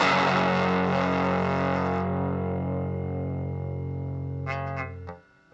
Two octaves of guitar power chords from an Orange MicroCrush miniature guitar amp. There are two takes for each octave's chord.
amp, miniamp, guitar, distortion, power-chords, chords